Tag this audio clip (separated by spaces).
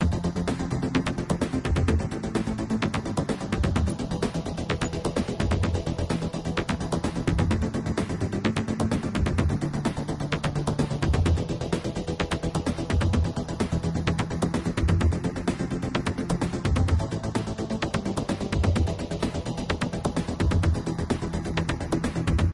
80s dark evil future loop synth